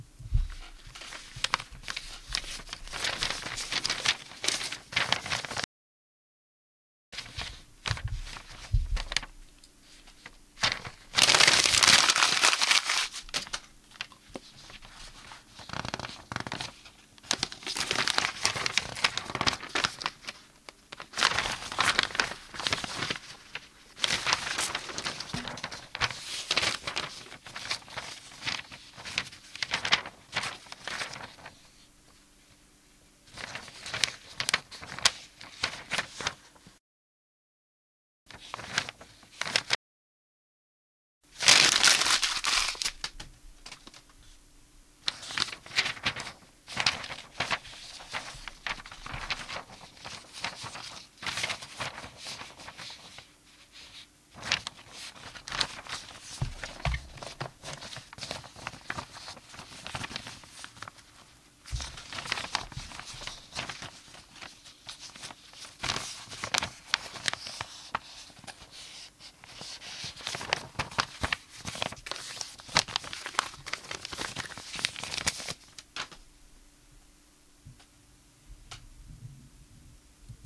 messing with paper
A recording of myself turning a piece of paper over in my hands and rustling it as if reading a letter or studying papers. There are a couple of 'scrumpling' sounds as the pages are thrown away.
reading, pages, turning, working, rustling, paper, studying